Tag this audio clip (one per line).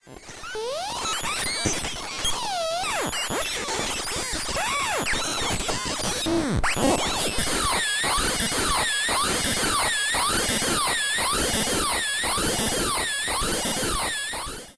alesis
blips
beeps
synthesizer